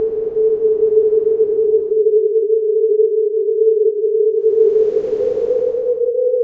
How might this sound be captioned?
Guerard Karl 2012 13 son3
Audacity, Synth